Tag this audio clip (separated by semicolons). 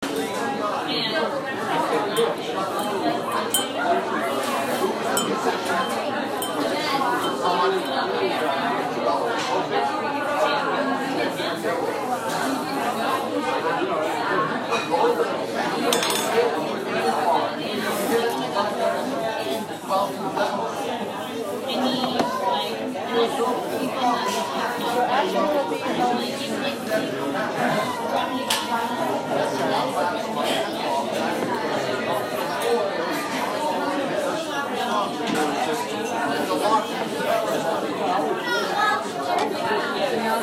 canteen; diner; dinner; dishes; food; lunch; restaurant